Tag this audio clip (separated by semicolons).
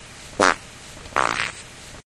beat; flatulence; explosion; frog; art; frogs; flatulation; car; poot; nascar; laser; noise; weird; race; gas; snore; space; ship; computer; aliens